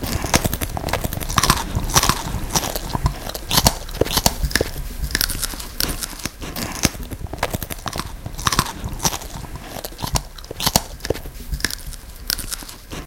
Bone Crunching
A recording of someone eating a carrot edited to sound like a bone is being crunched on. At leas that is the intended resulting sound.
crunching, eating, crushing, bone, crunchy, bones, a